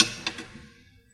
Bonks, bashes and scrapes recorded in a hospital at night.
hit, hospital, percussion